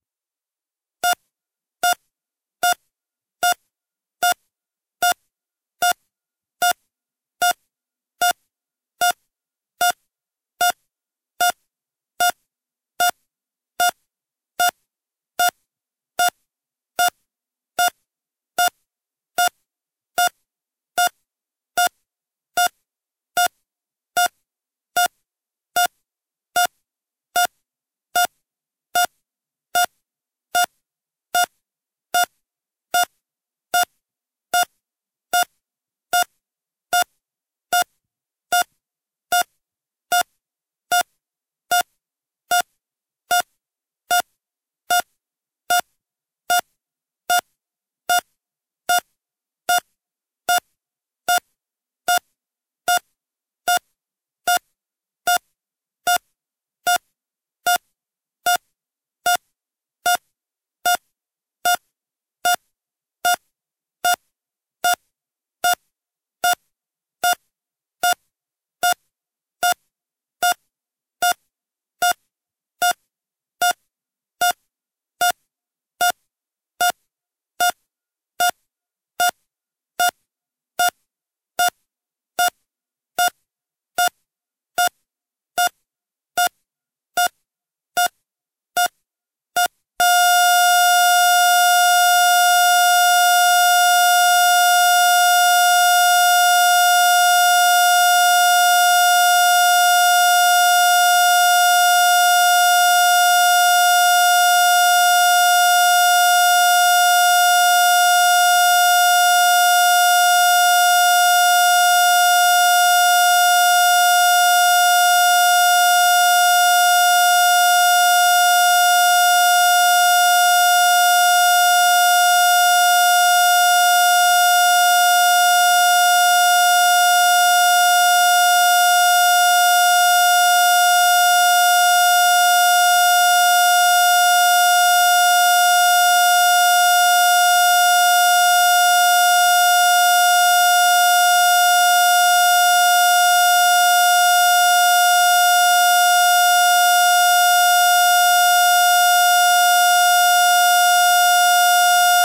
Steady Heart Monitor To Flatline Unhissed
Created with Adobe Audition from a single beep from a recording made in a hospital.Hiss removal with Izotope RX.
heartbeat, electronic, beep, flatline, heart-monitor, monitor, oximeter, heart, heartbeat-monitor